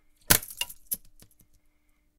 Loose spring.
{"fr":"Ressort qui lâche","desc":"Ressort lâchant.","tags":"ressort lache"}